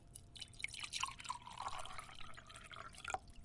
Liquid being poured into a cup